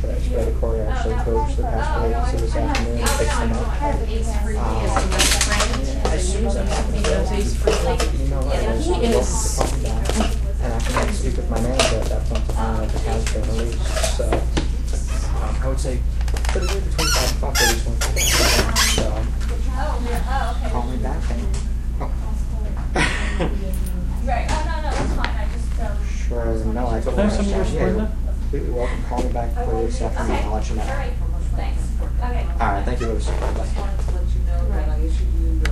office ambience long
Sounds of a small office recorded with Olympus DS-40 with Sony ECMDS70P.
field-recording, office